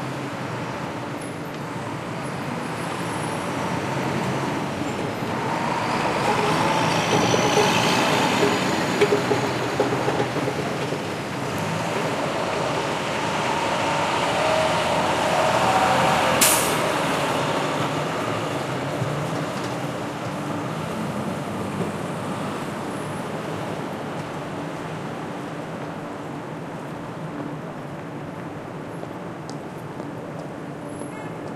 Mid range field recording of the area in front of the New York Times building in New York, taken from opposite the building on the corner of 8th Ave and West 40th St. Cars can be heard driving by, horns are honked, brakes are trodden etc. A sweeper vehicle features sometimes.
People can rarely be heard, as the recording was done at about 6 AM on a Saturday morning in March 2012.
Recorded with a Zoom H2, mics set to 90° dispersion.